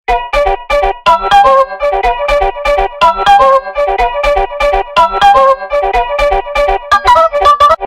Hype Crowd
Something 2 Get The cROWD HypEd.